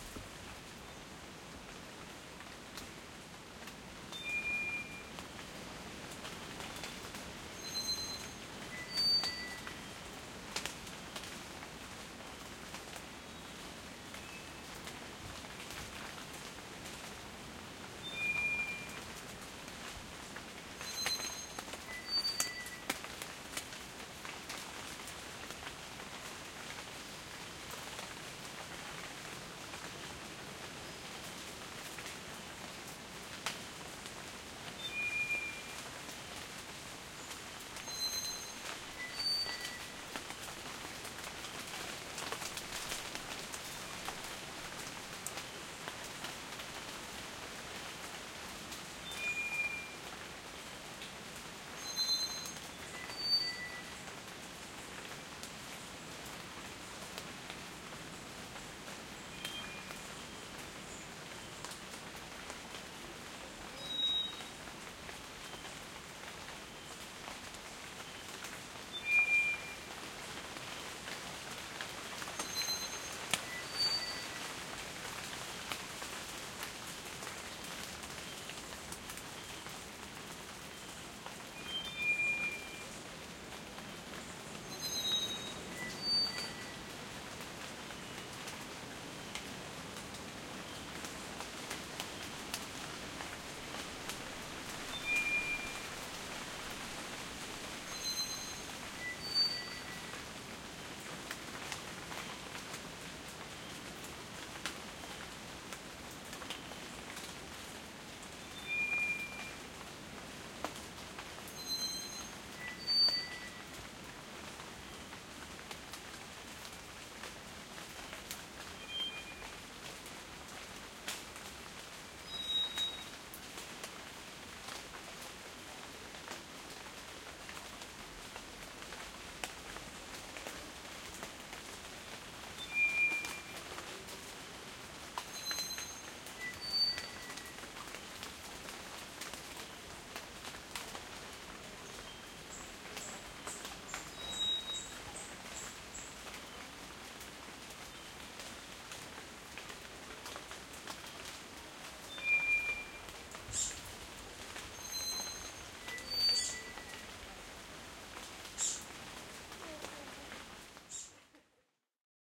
cr cloud forest 07
An ambient field recording from the cloud forest near Monteverde Costa Rica.
Recorded with a pair of AT4021 mics into a modified Marantz PMD661 and edited with Reason.
ambient, animals, birds, birdsong, costa-rica, field-recording, forest, nature, outside, tropical, wind